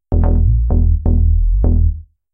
Techno Basslines 012
Made using audacity and Fl Studio 11 / Bassline 128BPM